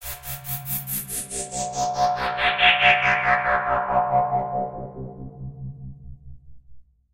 filter movement
organic, weird